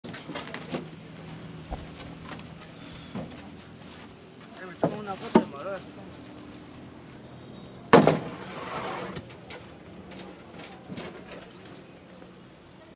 Lima construccion
construction, worker